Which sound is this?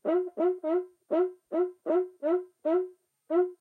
Different examples of a samba batucada instrument, making typical sqeaking sounds. Marantz PMD 671, OKM binaural or Vivanco EM35.
rhythm, groove, brazil, drum, pattern, percussion, samba